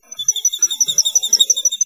Bird-Like-Chair-Squeak-2
Odd high-pitched squeak from my office chair sounds enough like baby birds chirping to get my cats looking around for them.
bird, chair, chirp